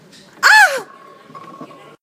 Young Girl Scream
666moviescreams, girl, human, pain, painful, scream, voice, woman, yell